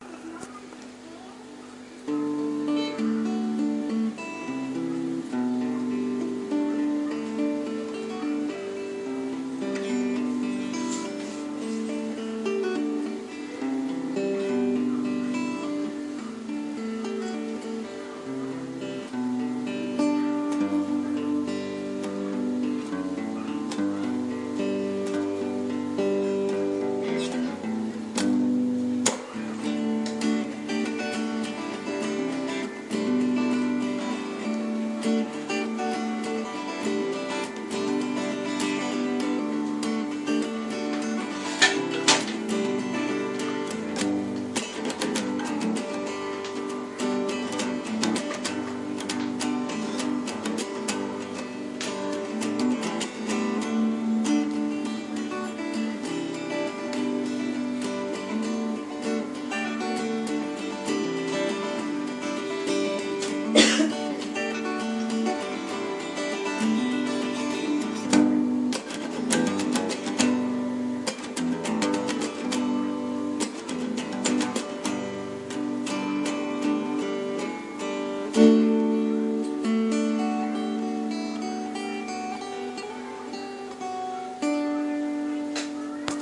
Cool Stringz
Uneek guitar experiments created by Andrew Thackray
Guitar, instrumental, strings